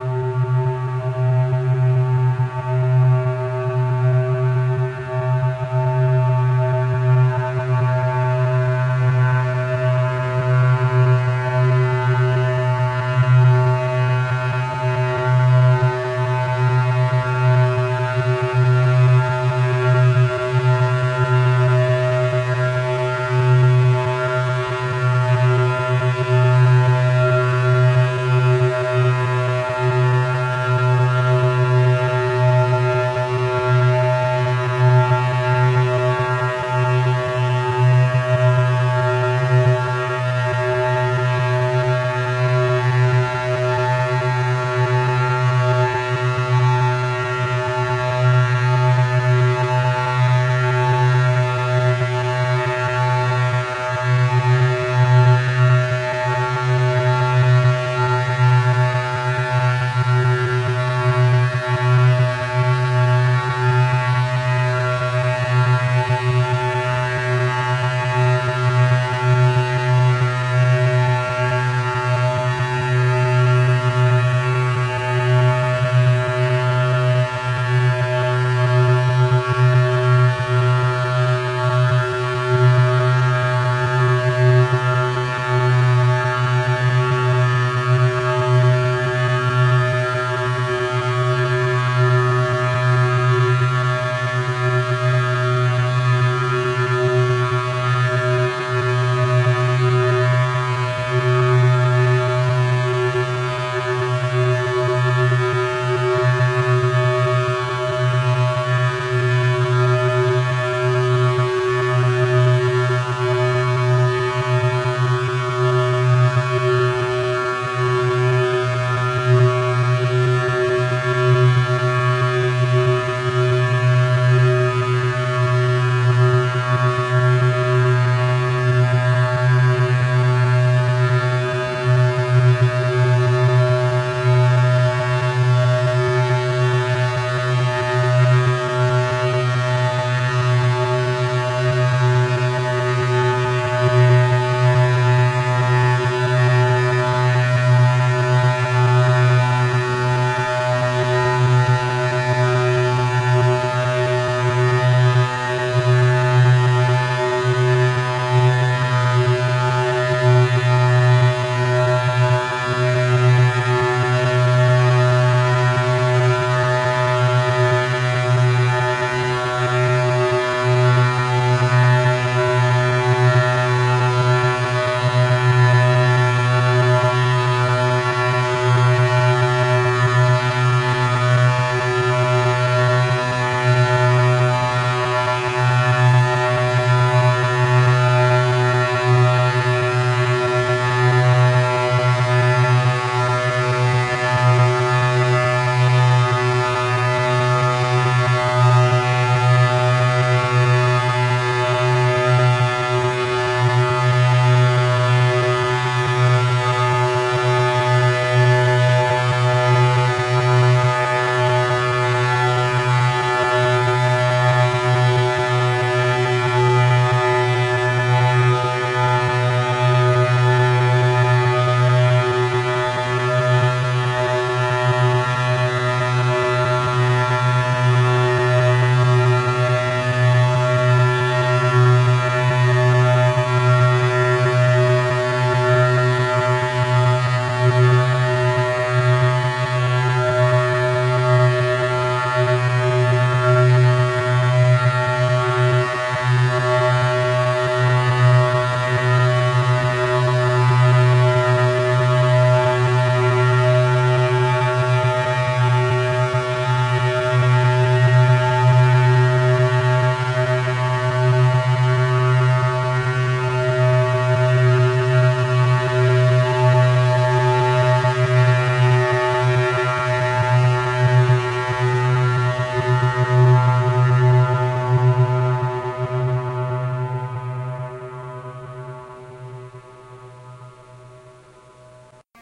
Clarinet drone
drone made from a clarinet note
clarinet, drone, soundscape